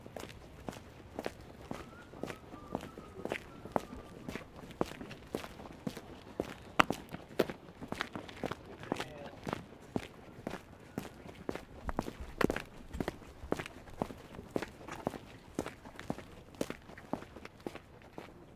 Footsteps on concrete, early morning ambiance, men, low whistling 1
ambiance, concrete, foot, footsteps, man, steps, walk, walking, whistle